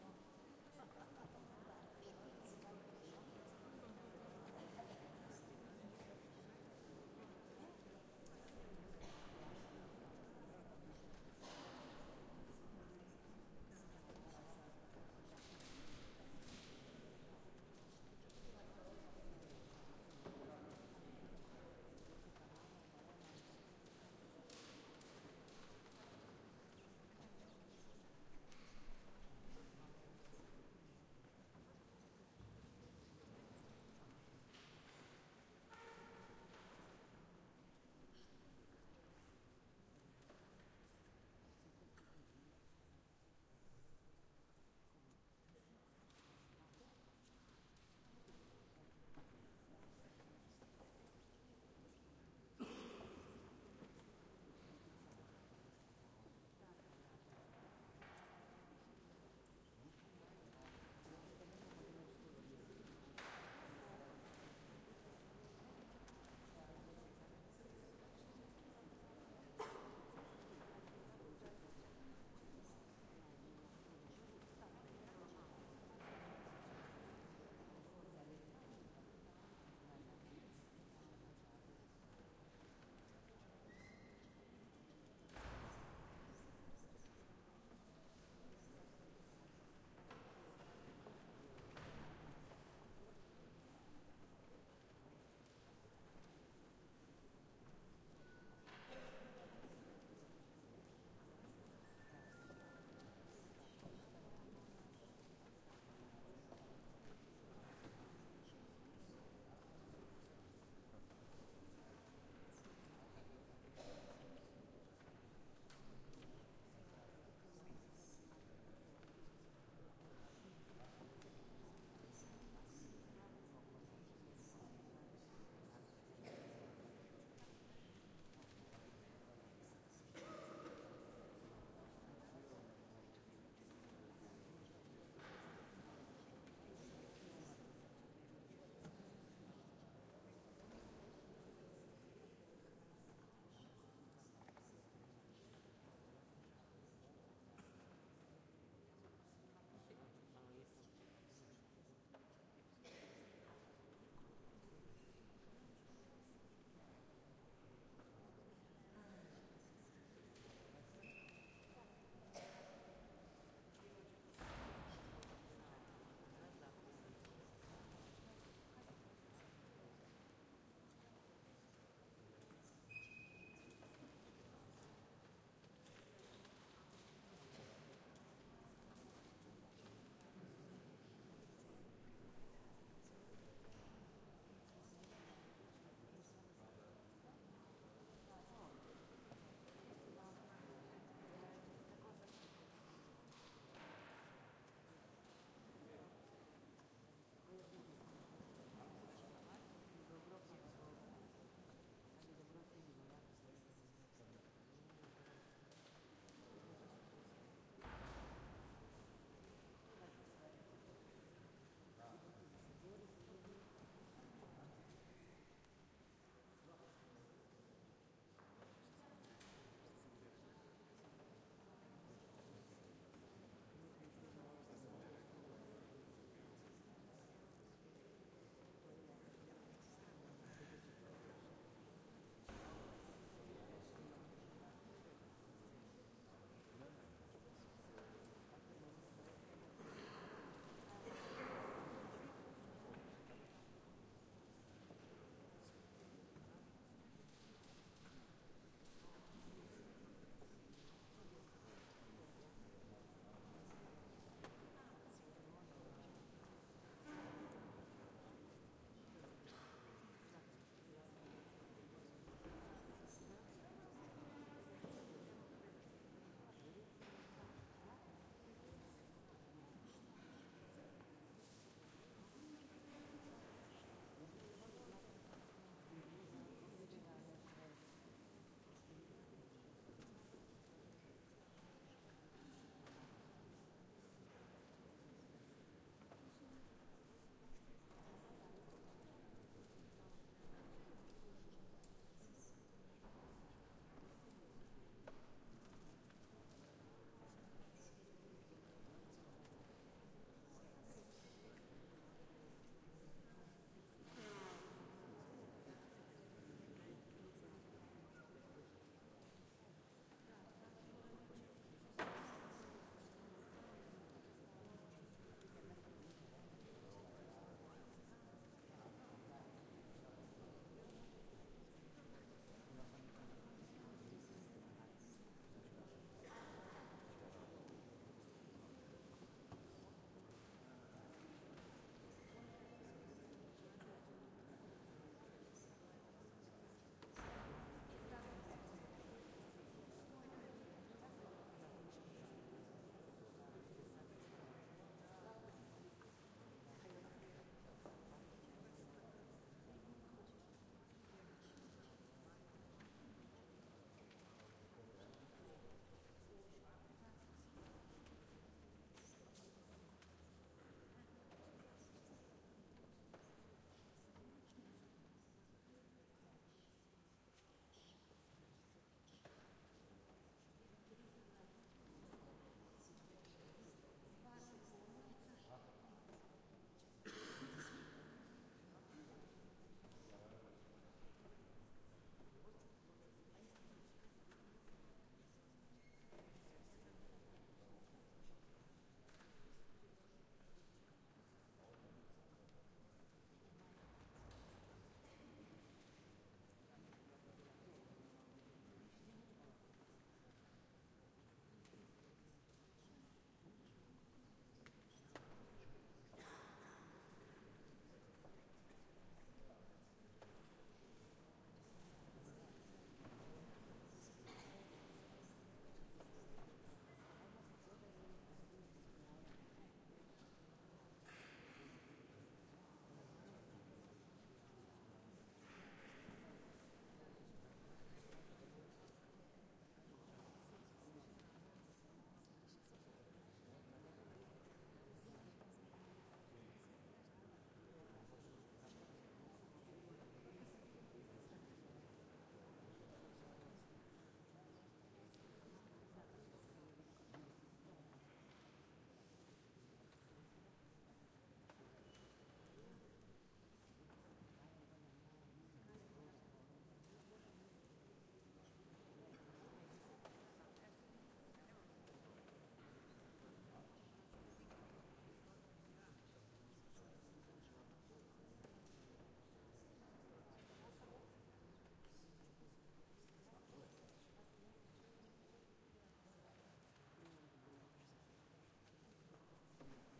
090227 01 people church concert
people are waiting concert in church